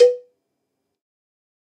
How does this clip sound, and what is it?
MEDIUM COWBELL OF GOD 036
cowbell, drum, god, pack